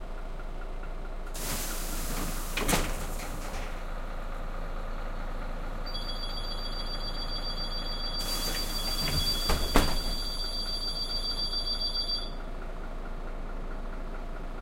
bus door opening and closing at bus stop
Sound of doors opening and closing at bus stop with alarm and without ambient noise of passengers.
alarm, bus, bus-stop, close, door, open, transportation